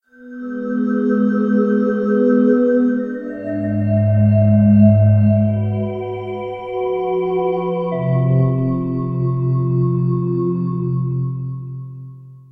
synthetic sound that is somewhat tuneful
melodic, synth